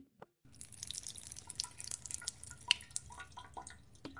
It is a sound of water. It is a foreground sound.
This is recorded in a bathroom, we can appreciated the sound of the drops of water from a faucet, it is recorded with a tape recorder zoom H4n.
drops, faucet, water